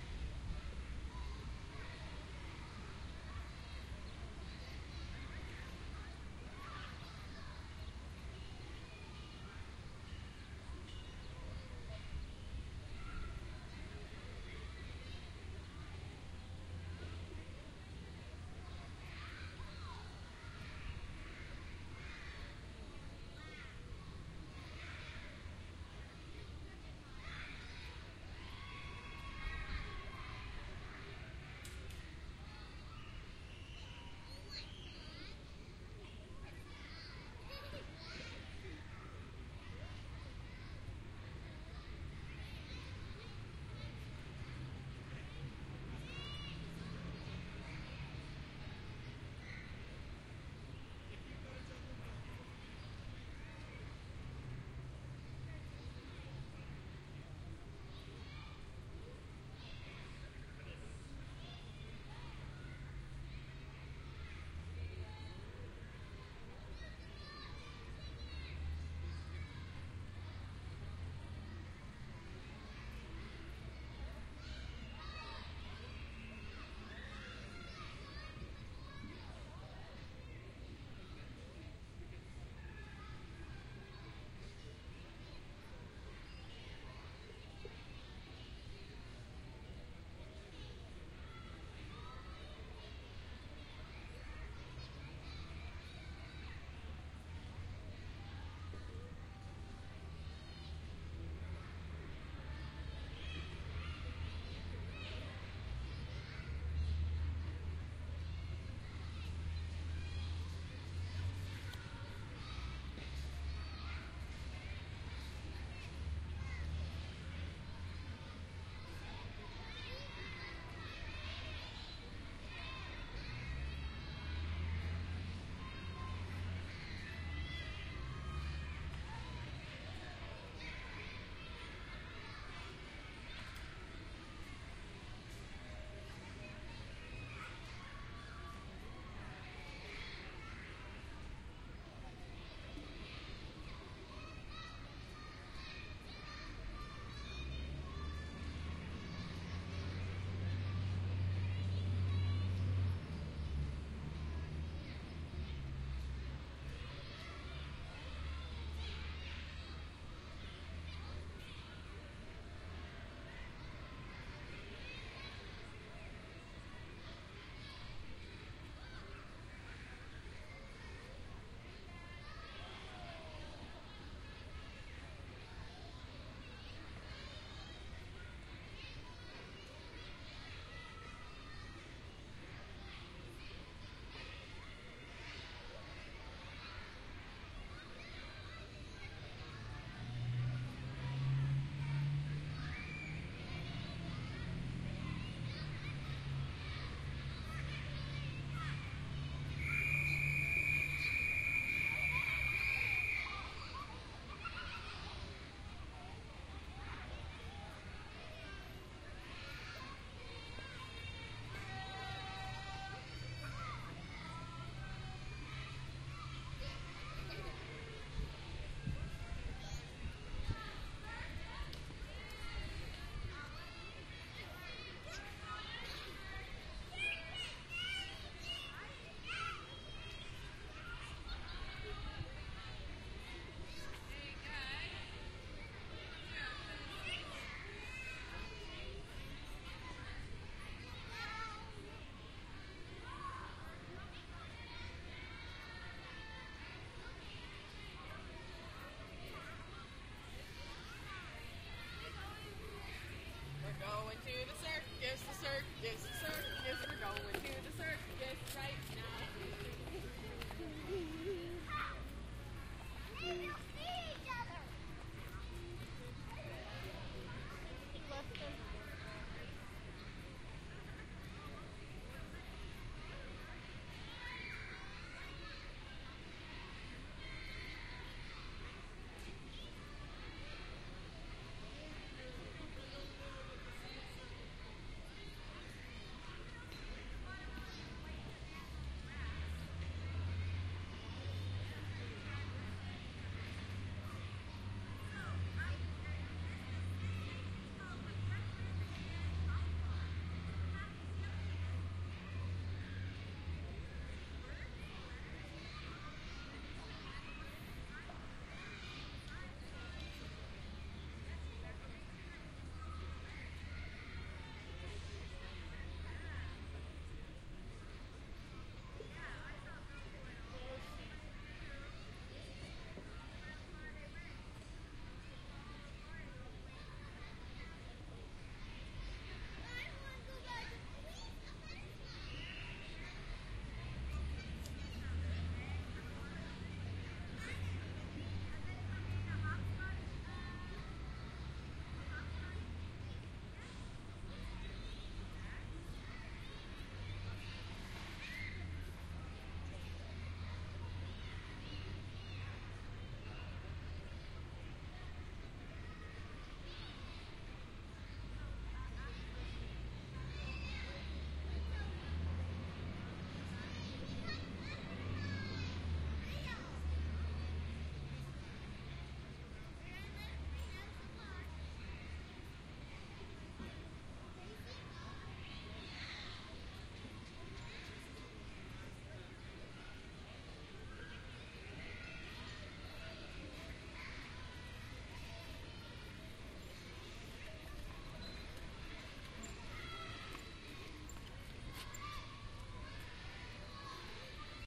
Stereo binaural recording of happy children playing at the park.
binaural, children, city, field-recording, happy, park, playground, playing, stereo, town